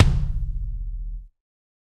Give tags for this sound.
drum; god; kick; kit; pack; realistic; set

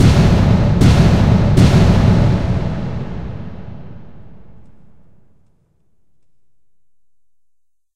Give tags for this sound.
scary; slow; cinematic; slam; 3; thriller; horror; fear; hit; cringe; emphasis; scare; surprise

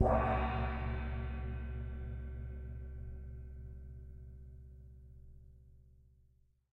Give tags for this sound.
beat; bell; bowed; china; crash; cymbal; cymbals; drum; drums; gong; groove; hit; meinl; metal; one-shot; paiste; percussion; ride; sabian; sample; sound; special; splash; zildjian

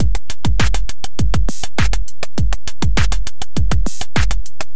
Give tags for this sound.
101bpm; loop; engineering; rhythm; operator; Monday; PO-12; drum-loop; distortion; drum; cheap; beat; mxr; teenage; pocket; percussion-loop; machine; drums

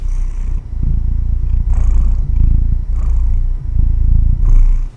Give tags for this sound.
feline; kitten; kitty; purring

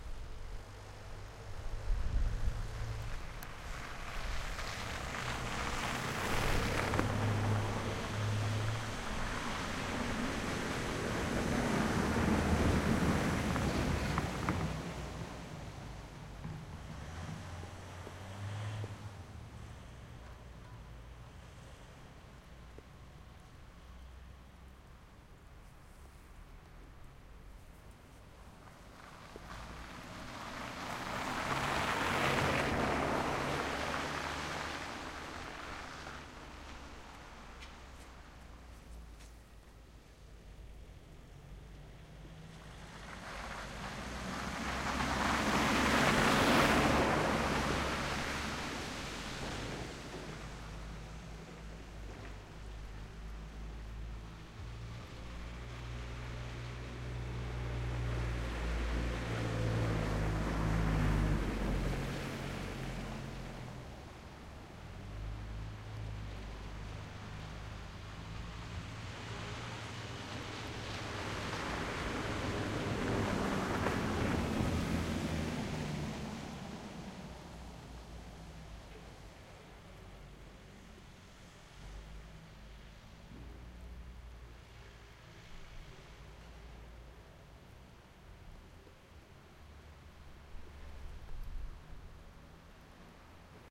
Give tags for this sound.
ice; winter; ambience; snow; urban; city-noise; slow; automobile; wet; field-recording; traffic; drive; car; street-noise; cars

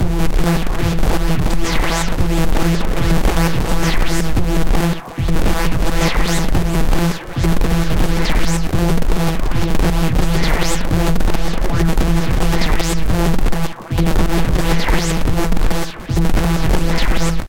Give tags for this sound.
Abstract; Design; Effects; Electric; Glitch; Random; Sci-fi; Sound; Sound-Design; Weird

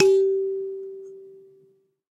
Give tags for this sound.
african; kalimba; percussion; sanza

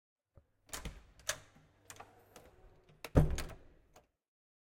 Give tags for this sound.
CZ; Panska; Czech

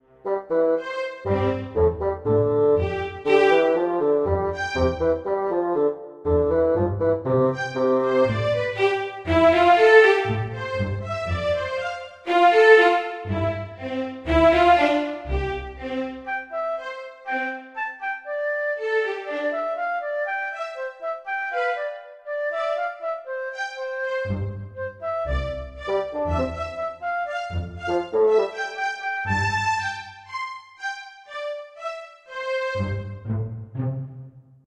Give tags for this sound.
fantasy; music

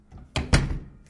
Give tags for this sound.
sounds; door

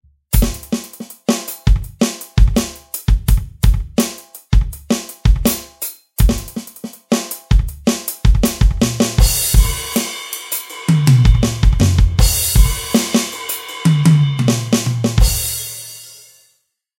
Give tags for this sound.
drum; groove

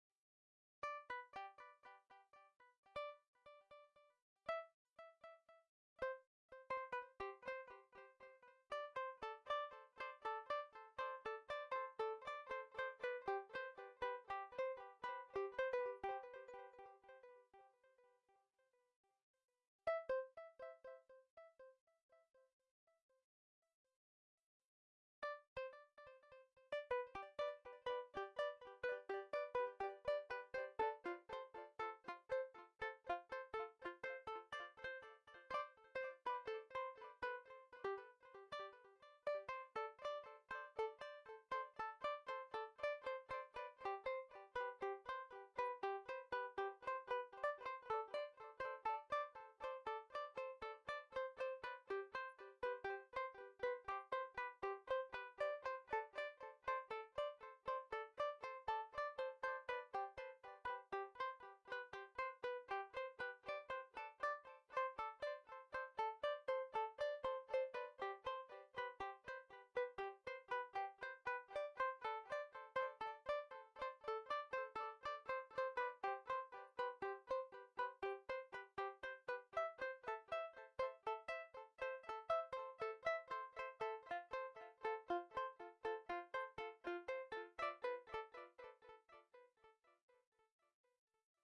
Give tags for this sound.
sequence; synthesizer

